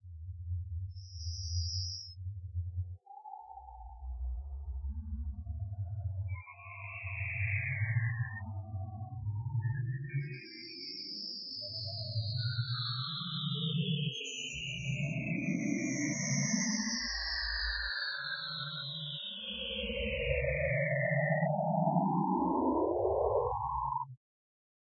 Extraterrestrial intercourse sound created with coagula using original bitmap image.